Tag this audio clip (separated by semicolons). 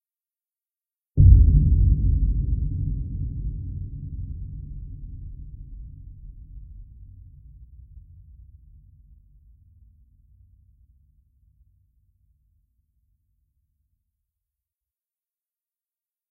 bass; bassy; boom; deep; explosion; heavy; large; low; rumble